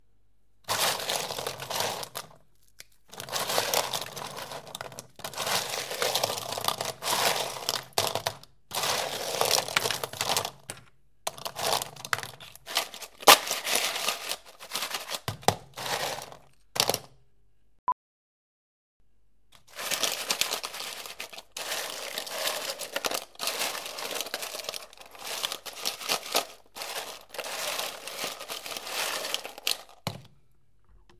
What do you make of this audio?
Ice in bucket
catering, pack, stereo, tap, water